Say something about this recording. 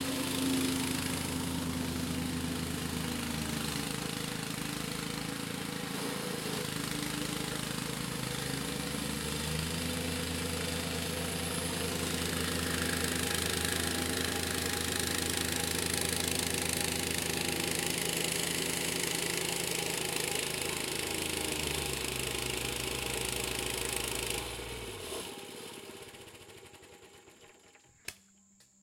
Tamping machine used to tamp stone dust for patio